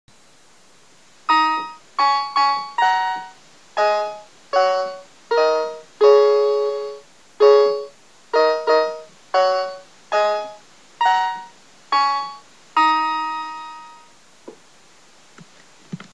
i am playing a tune on my keaboard that makes me think "WHAT IS CHINA LIKE?"